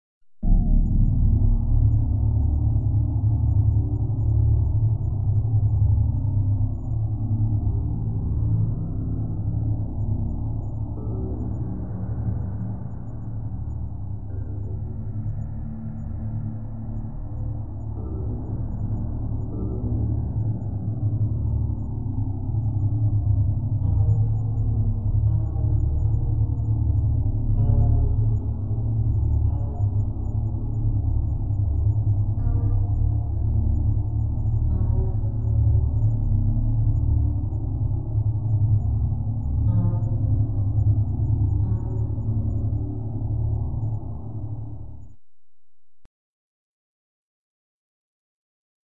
ambience deep rumble